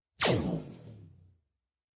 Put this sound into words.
A close perspective recording of me pulling open a roll of electrical tape.
This amazing sound is only possible to witness from the perspective of a microphone.
Recorded with a Shure MV88.